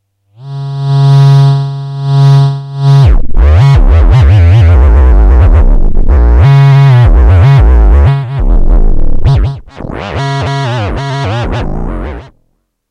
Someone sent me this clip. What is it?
Virtual theremin sounds created with mousing freeware using the MIDI option and the GS wavetable synth in my PC recorded with Cooledit96. There was a limited range and it took some repeated attempts to get the sound to start. Third voice option dry.
free, mousing, sample, sound, theremin